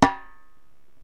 My rim shot on my snare with no snap.